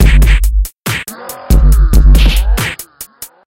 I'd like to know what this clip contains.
hard kick tight ass hat and punch loop
Don't forget to check full version!